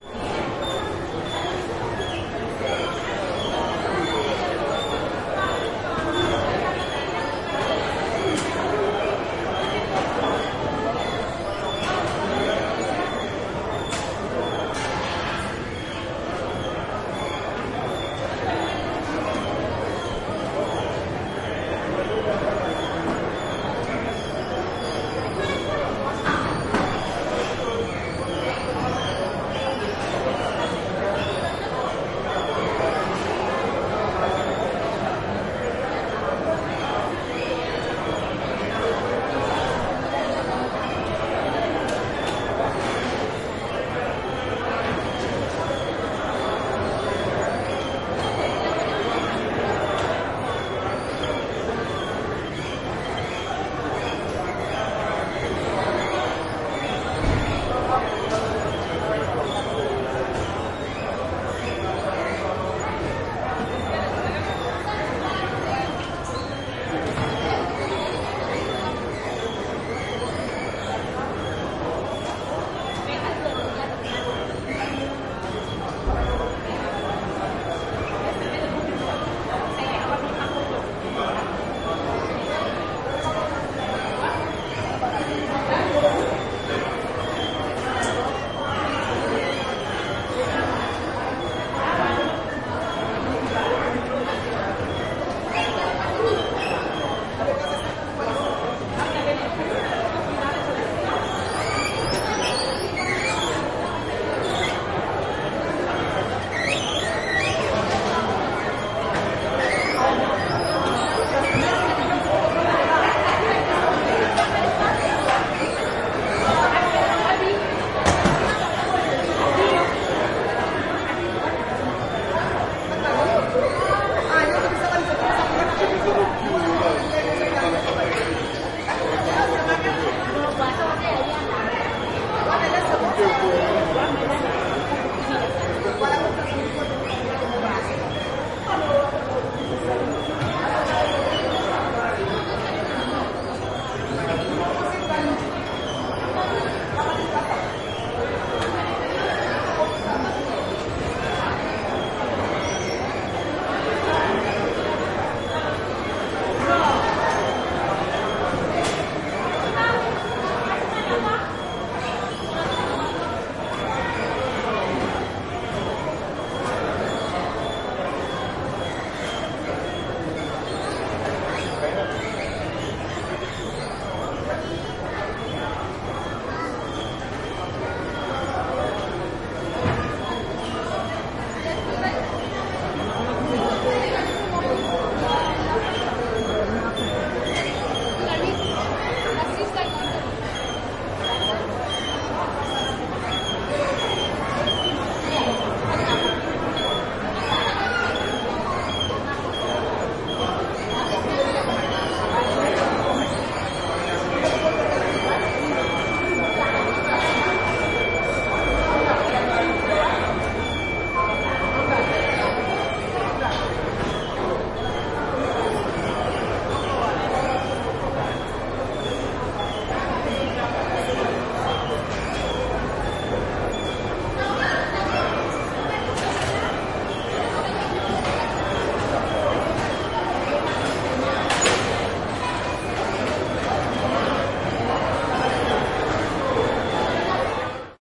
Aéroport Dakar attente Bagages / Dakar airport
Enregistrement effectué à l'aéroport de Dakar en attendant les bagages / Recording made during the baggages wait at Dakar's airport.
Recorded with a ZooM H6 / MS mic
a, Africa, airport, bagages, baggages, crowd, Dakar, mecanic, people, roport, Senegal, speaking, talking